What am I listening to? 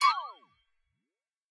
Tweaked percussion and cymbal sounds combined with synths and effects.